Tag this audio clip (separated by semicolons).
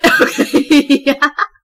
english; female; laugh; speak; talk; voice; woman